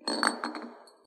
Recording of an empty bottle rolling over the floor. Used for a sound effect in a computer game to reflect empty healing potions. This sound was recorded with a Sony PCM M-10 and edited for the Global Game Jam 2015.